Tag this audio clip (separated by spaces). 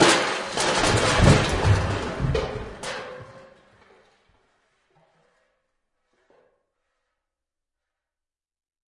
cup half